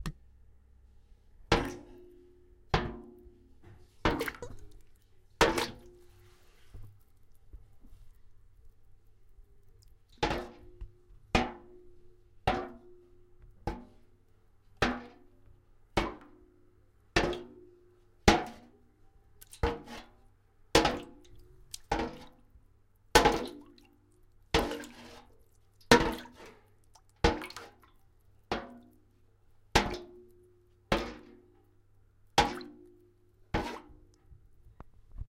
Bucket Full of water on hard surface hit Close
Bucket, water, hit